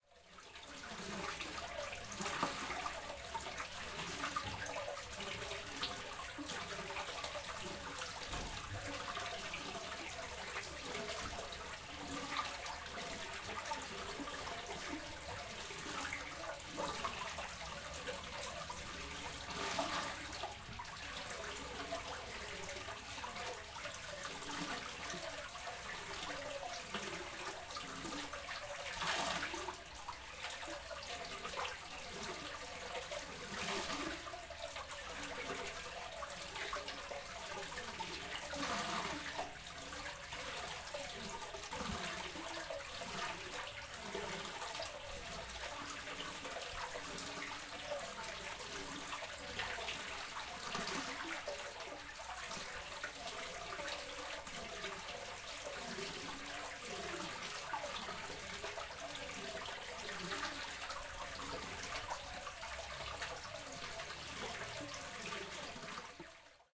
Alanis - Fountain in Calle de las Angustias (general) - Fuente en Calle de las Angustias (general)
Date: February 23rd, 2013
The sound of a fountain in Alanis (Sevilla, Spain). This was recorded in a sunny afternoon, with little traffic around.
Gear: Zoom H4N, windscreen
Fecha: 23 de febrero de 2013
El sonido de una fuente en Alanís (Sevilla, España). Esto fue grabado en una tarde soleada, con poco tráfico alrededor.
Equipo: Zoom H4N, antiviento
Alanis, Espana, Sevilla, Spain, agua, field-recording, fountain, fuente, grabacion-de-campo, liquid, liquido, plaza, square, water